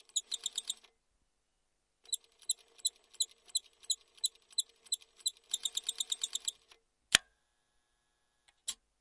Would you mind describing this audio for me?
Queneau machine à coudre 11
son de machine à coudre
coudre, industrial, machine, machinery, POWER